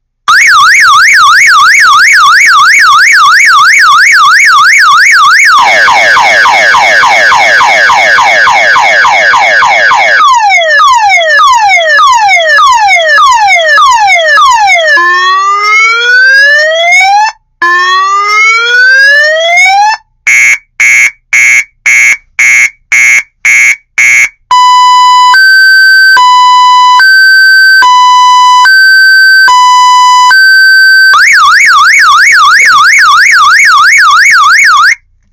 This is a recording of one of those annoying multi-toned car alarms.